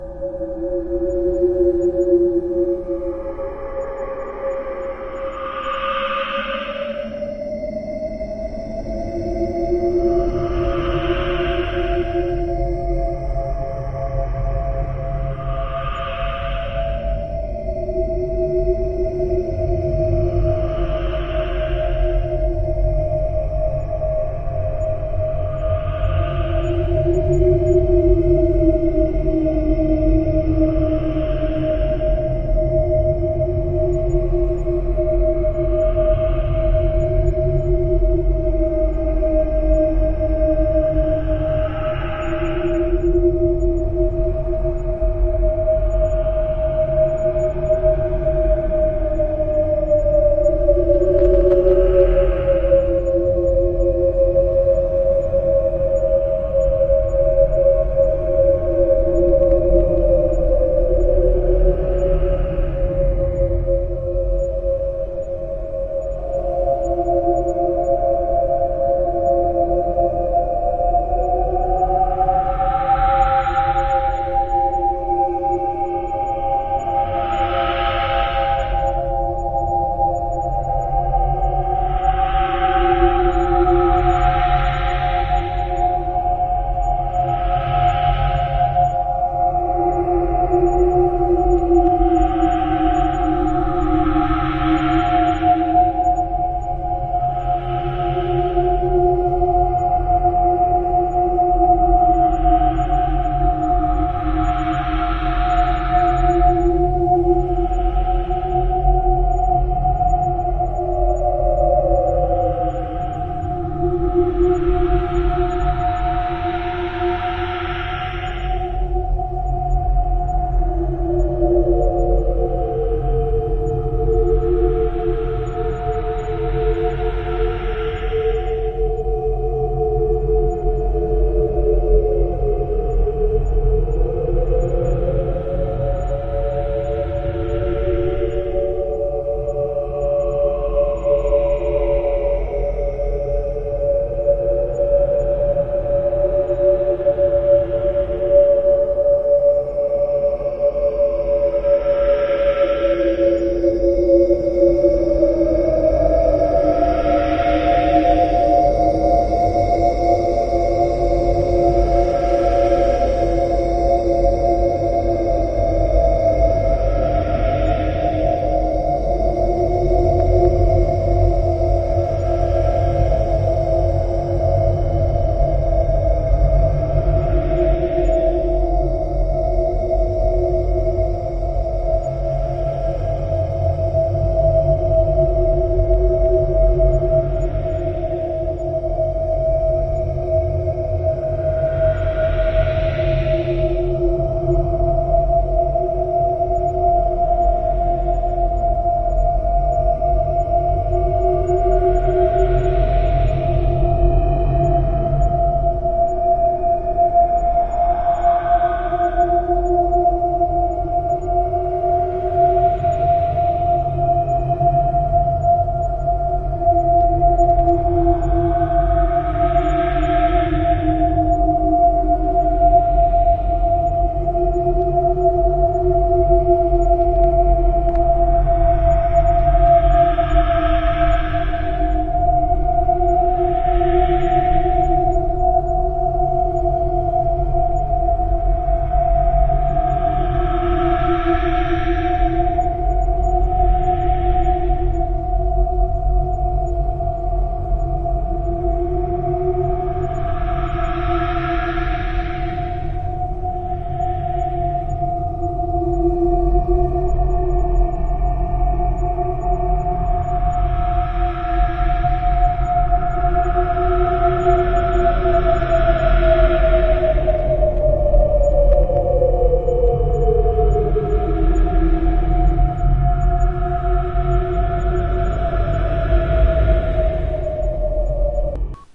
Recorded some sounds, filtered out the noize, reversed it and put Paulstretcher on it. Had to amplify the bass, because there was an incredibly awkward high-pitch sound in the background.